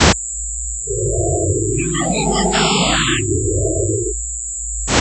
Random noise generator.
Noise, random, sound-design